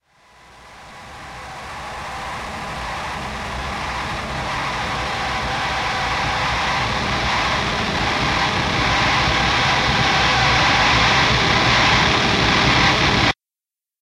STM1 Uprising 6
Over processed drone. Swells to a jumble of squeals and screeches and suddenly stops.